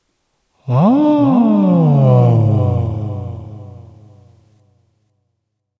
toilet moan